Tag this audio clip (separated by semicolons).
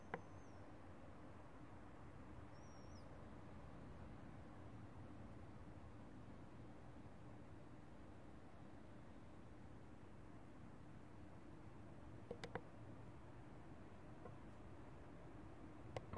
cars; field-recording; noise; street; traffic